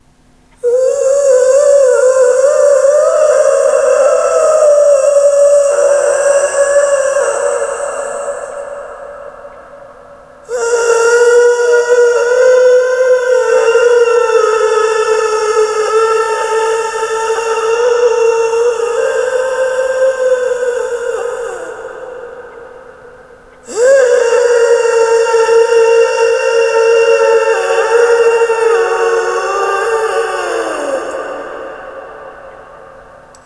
My rendition of a moaning wraith.
Creepy, Ghost, Halloween, Horror, Moaning, Scary, Spooky, Wraith